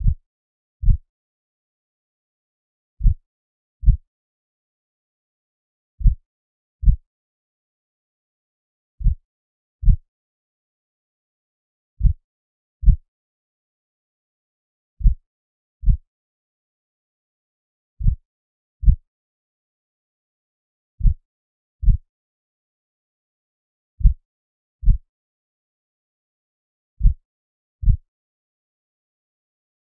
heartbeat-20bpm
A synthesised heartbeat created using MATLAB.
body, heart, heart-beat, heartbeat, human, synthesised